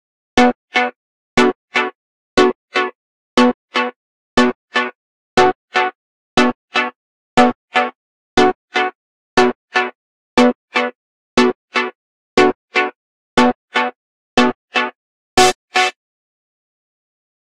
ae synthChordStab stereoToMono 120bpm
120 bpm. Key unknown. Created in Reason.
chord, chord-stab, synth